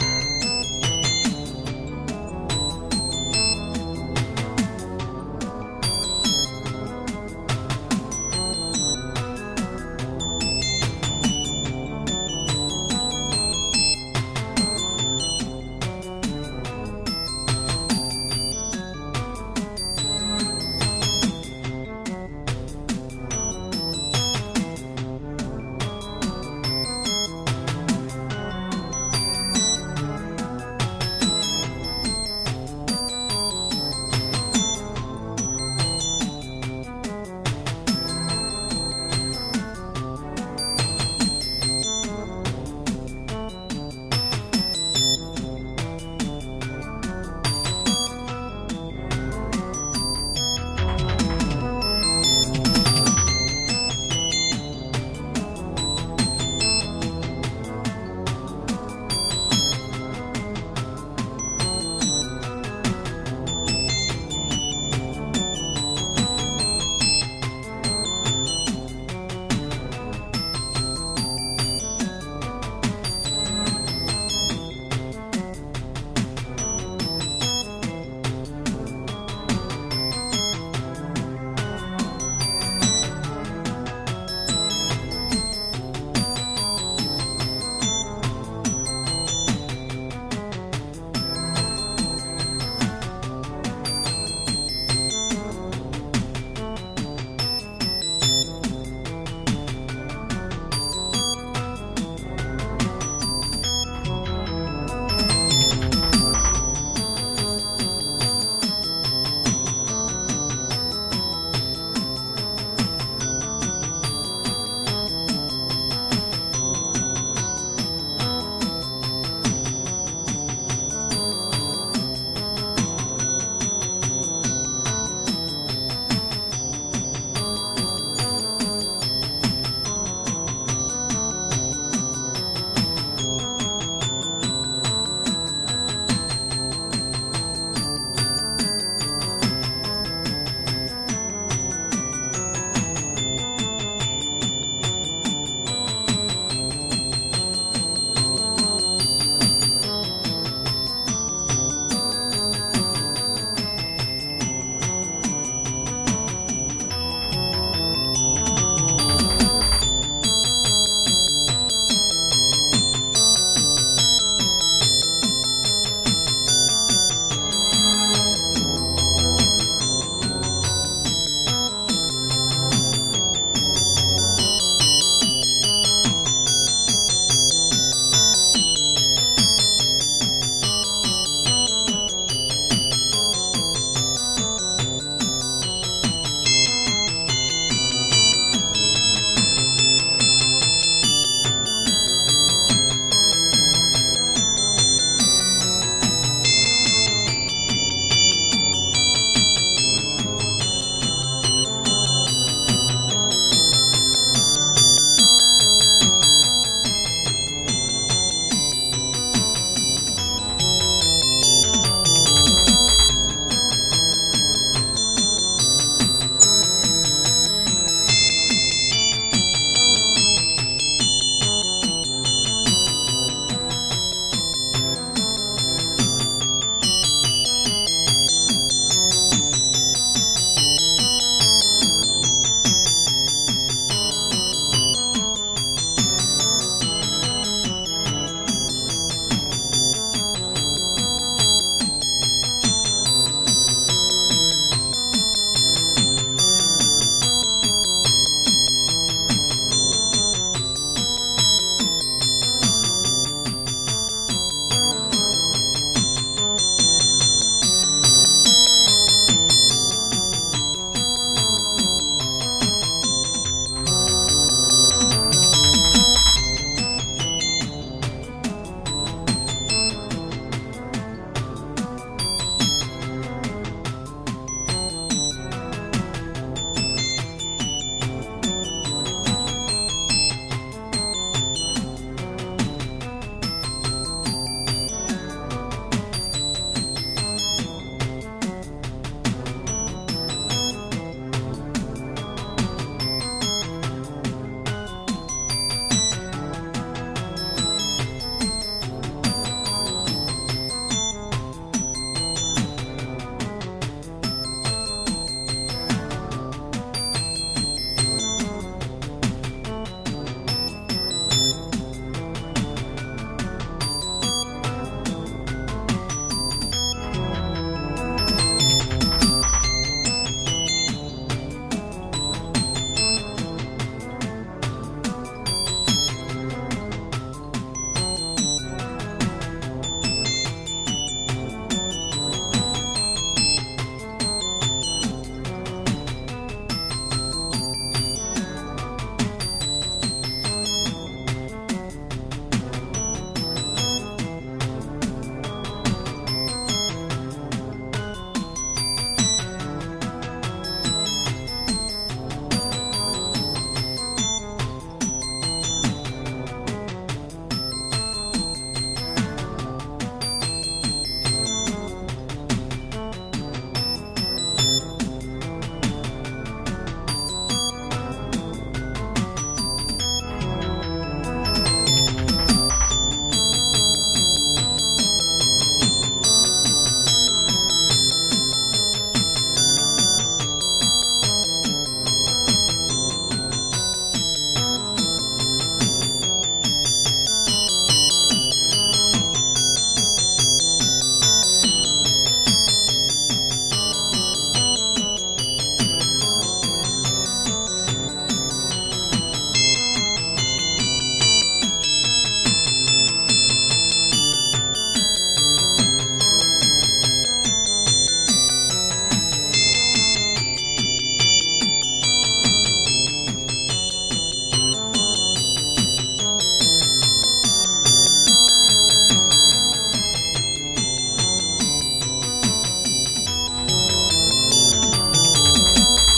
brown eyesuptemp1
like a band of alien vampires making music, uptempo